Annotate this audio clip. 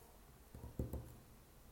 35-Toque de dedos
Foley practice vampire sounds
Foley, practice, sounds, vampire